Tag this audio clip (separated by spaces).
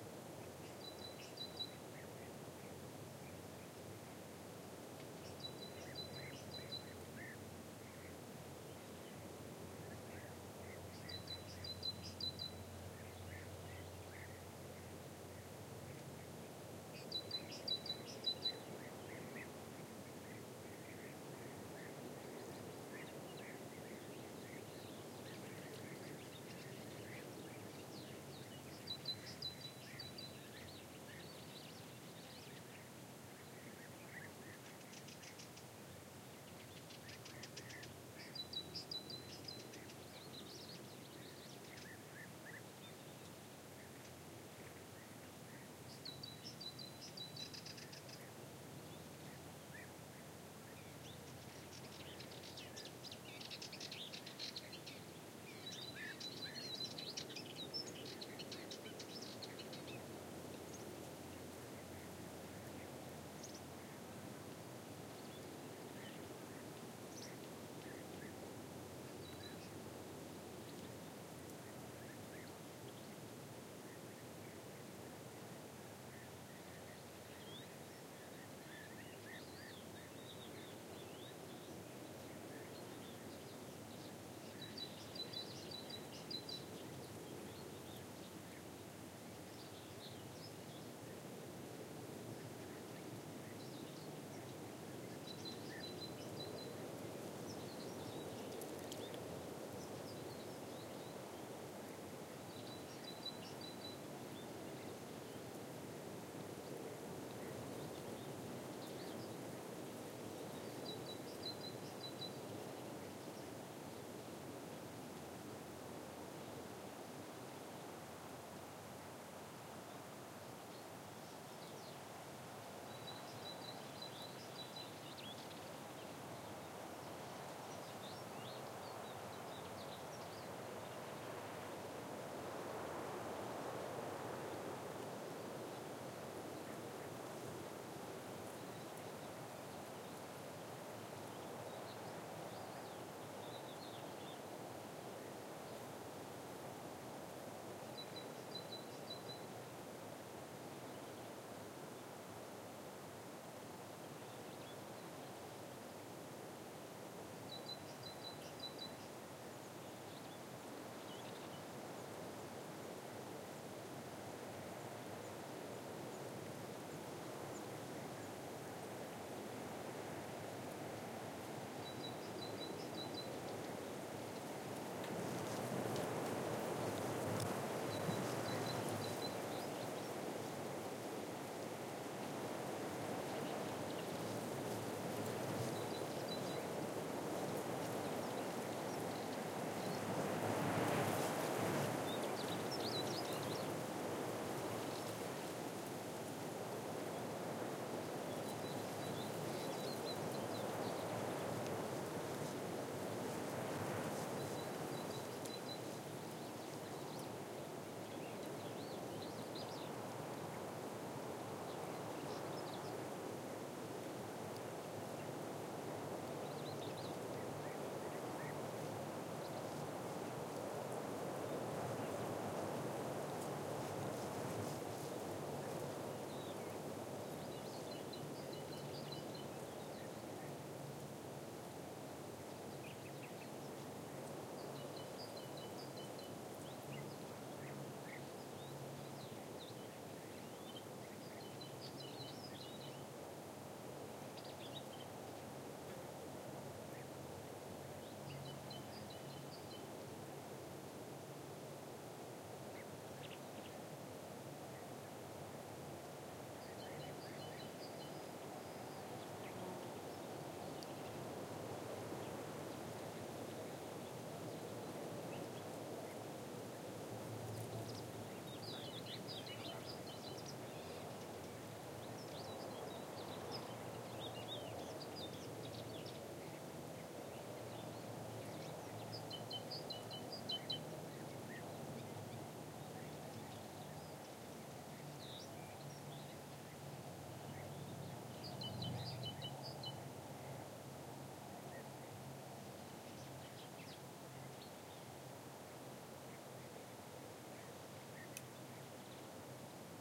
field-recording nature scrub south-spain